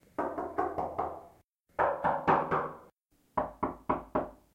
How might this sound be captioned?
Knock Sound
Three different knocks on wood door.
knock, door-knock, wood-door